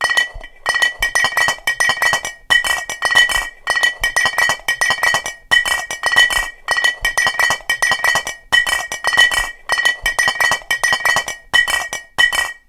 Milk Bottles clanking
milk, clancking, bottles